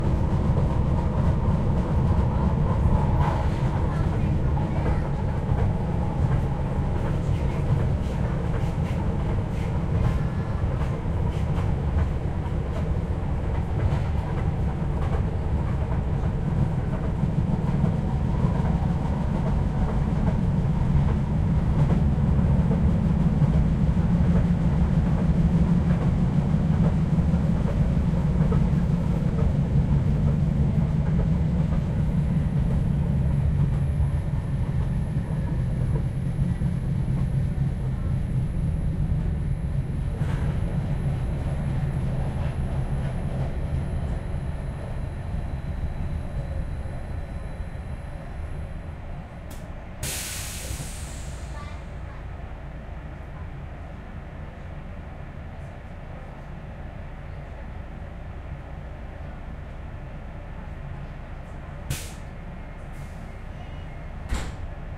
ambience Vienna underground train inside
Ambience recording inside an underground train in Vienna.
Recorded with the Zoom H4n.
ambience, atmosphere, field-recording, inside, metro, station, subway, train, underground, vienna